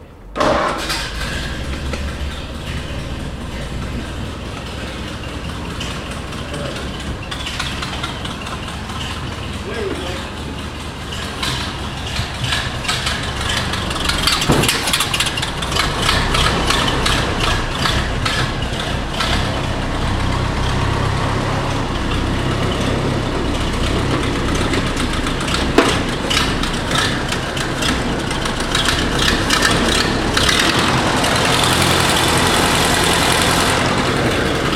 Pegasus starting
Restored 1929 Model A Ford truck starting up. The vehicle was used as a bookmobile in Everett, Washington from 1929 to 1950.